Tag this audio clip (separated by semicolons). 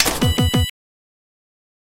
unlock; door; sci-fi; granted